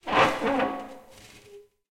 Glass Windows Squeaking
In a basement I recorded noises made with a big glass plate. Rattling, shaking, scraping on the floor, etc. Recorded in stereo with Rode NT4 in Zoom H4 Handy Recorder.
glass, move, movement, moving, plate, rumble, rumbling, scraping, screech, window